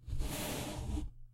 brushing a piece of toilet paper across my pop filter
soft, brush, woosh